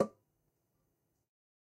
Metal Timbale closed 015
conga, record, trash, real, closed, god, home